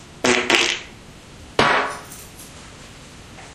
I want out NOW fart
fart poot gas flatulence flatulation